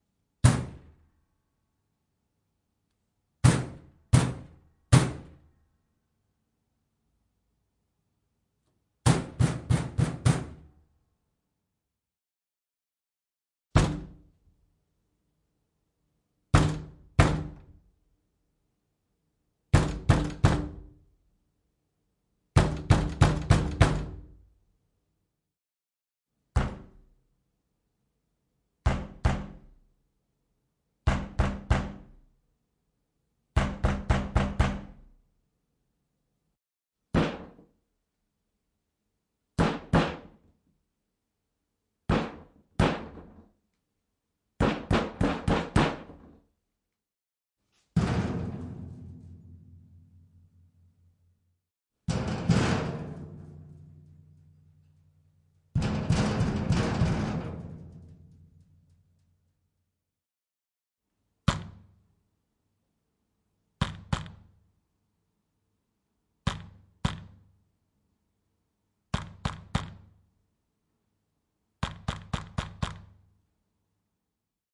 Knocking on the door with a fist.